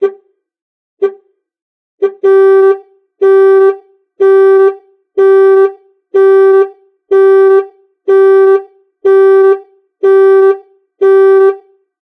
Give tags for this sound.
alarm
car
car-alarm
car-sound
mus152

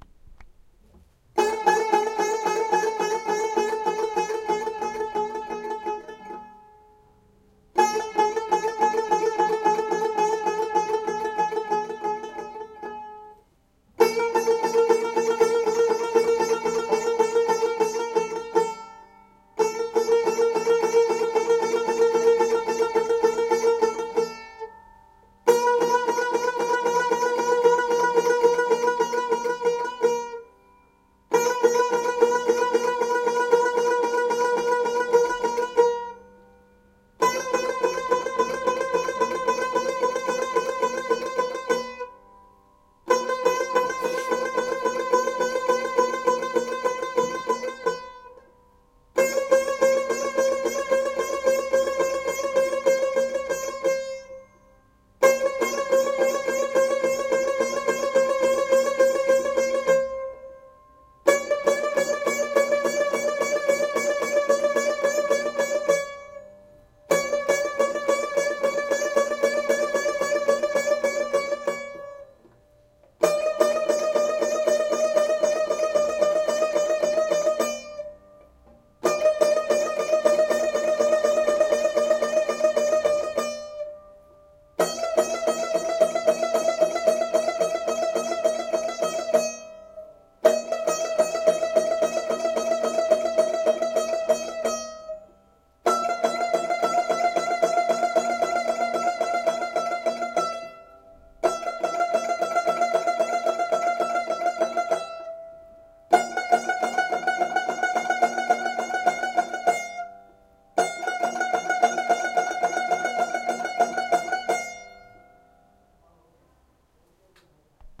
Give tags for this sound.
music
turkey
embellishments
tar
compmusic
makam

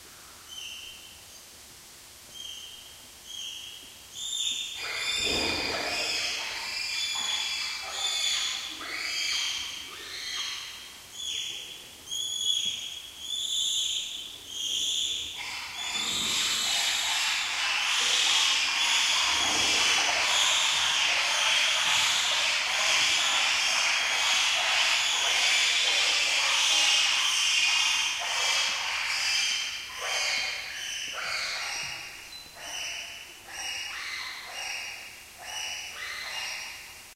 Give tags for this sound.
apes
bonobo
monkeys

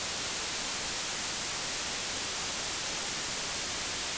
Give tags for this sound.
noise
whitenoise
white

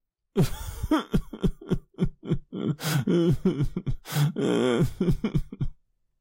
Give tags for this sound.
cry
crying
dnd
dungeonsanddragons
fantasy
podcast
roleplaying
rpg
sad
silly
sob
sobbing
whimper
whimpering

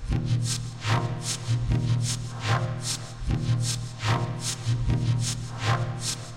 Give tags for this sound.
120bpm
ambience
atmosphere
electronic
guitar
loop
music
processed
rhythmic
synth

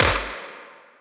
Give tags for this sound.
game
gun
gunshot
shot
video
videogame

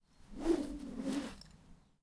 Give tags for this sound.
bamboo
swing
swinging
swish
whoosh
whooshing
woosh

